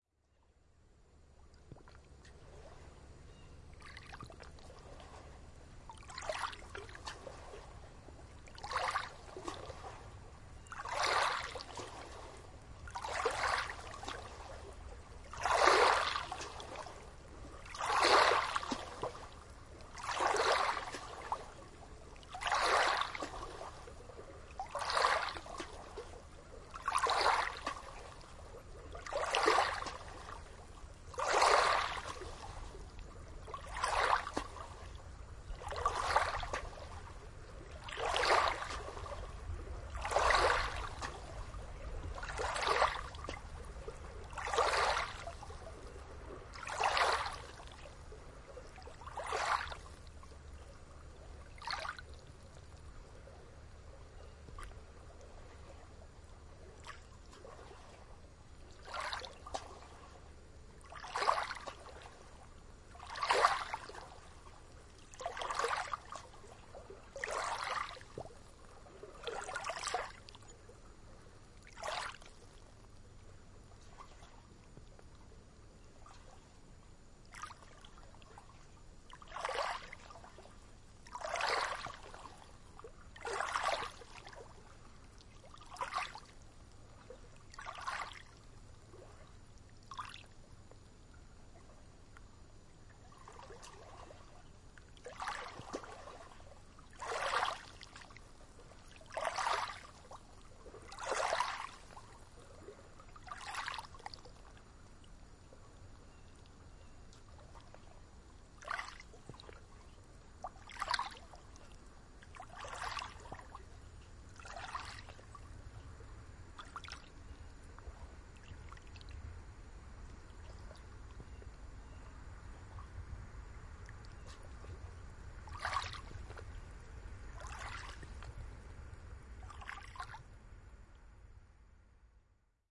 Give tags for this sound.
gentle; lake; lapping; small; water; waves

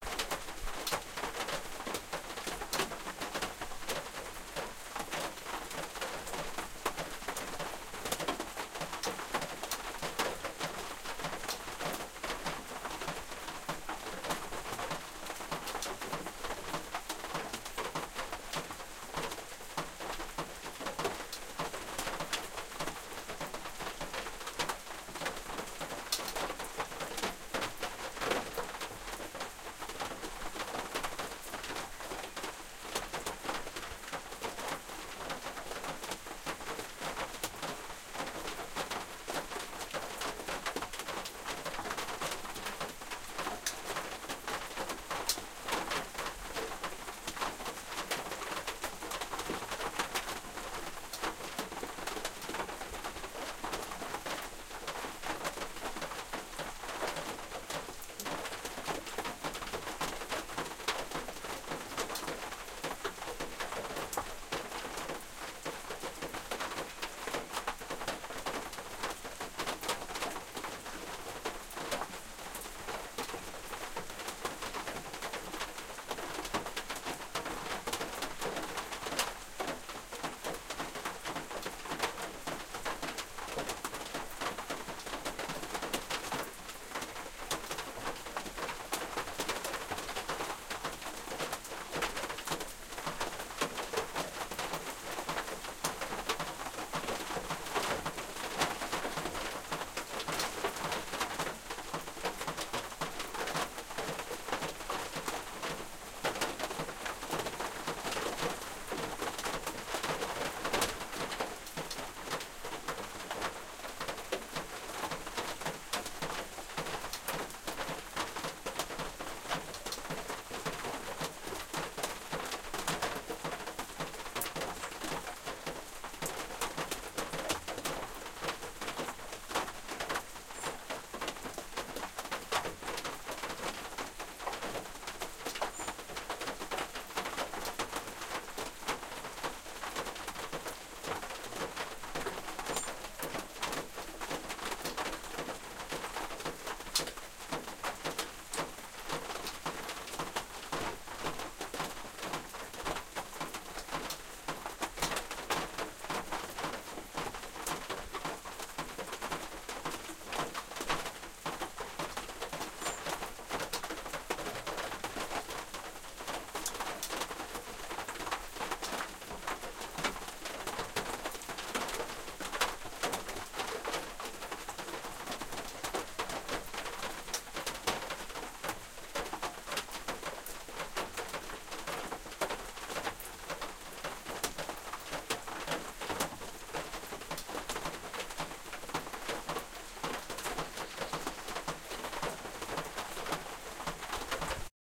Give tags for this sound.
Rain; Rumble; Roof; Metallic; Weather; Thunder; Storm

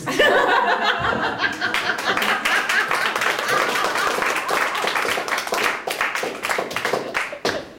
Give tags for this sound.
laughing; clapping; applauding

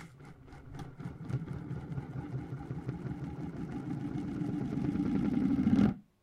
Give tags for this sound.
fx; roll; tub

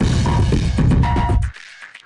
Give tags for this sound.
Battery-Powered; Break-Beat; Explosive; Klang; Lofi; Retro

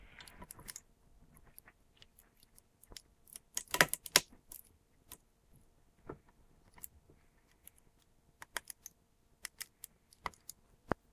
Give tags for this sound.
bench; can; clamp; crinkle; crush; press; seat; smash; soda

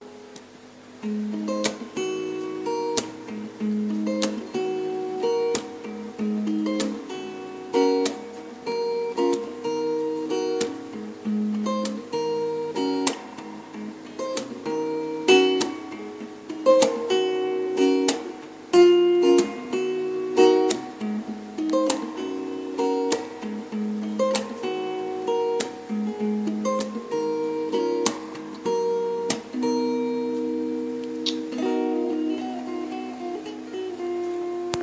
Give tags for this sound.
Guitar; instrumental; strings

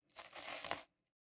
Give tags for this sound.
dry-bread; foley; pop; cookie; sound; graham; gingerbread; dusting; sound-design; dust

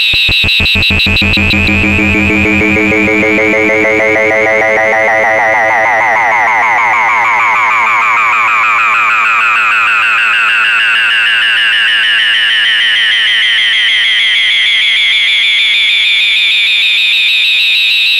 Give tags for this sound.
drone; experimental; noise; sci-fi; soundeffect

